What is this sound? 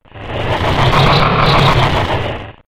guacamolly swello die
Swells and speeds... then reverses... dies and slows down. Lot of static and bass is faint/grindy.
bass, grind, static, swell